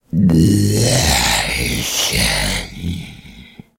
Zombie speaks
Inhuman creature zombie-like gasps. Zombie voices acted and recorded by me. Using Yamaha pocketrak W24.
gasps, beast, male, inhuman, brute, undead, breath, horror, moan, chock, zombie, snort